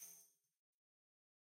tambourine shake 04
10 inch goatskin tambourine with single row of nickel-silver jingles recorded using a combination of direct and overhead mics. No processing has been done to the samples beyond mixing the mic sources.